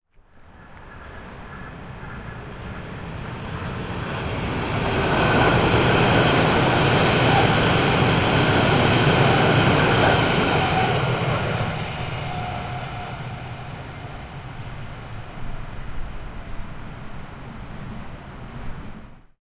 City Train Passing by
S-Bahn passing by. Recorded with ZoomH5, in Berlin Südkreuz
by, City, Passing, rail, S-Bahn, Schienen, tracks, train